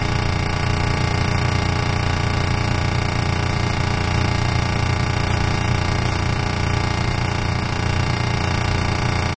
A malfunctioning heater, close up.